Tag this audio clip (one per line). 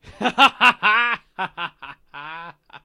laughing
laughter